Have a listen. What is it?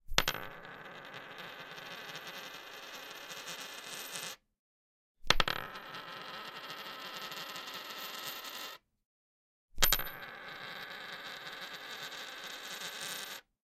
coin spinning on oak table
spinning, table, oak, coin